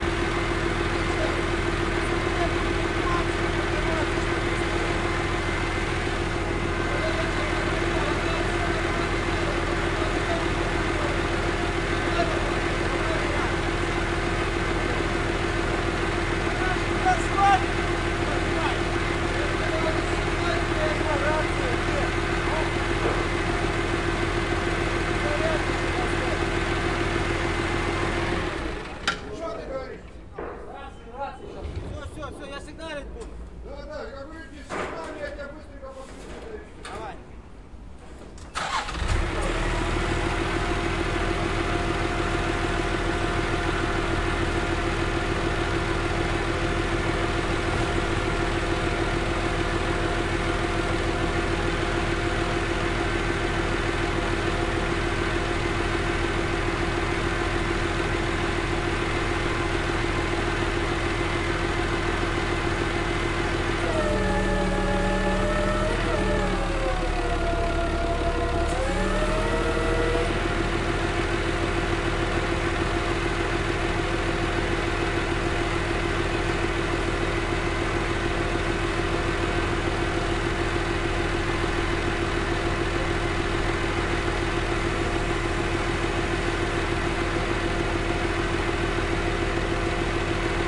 car lift2
Noise of car lift.
Recorded: 2012-11-08.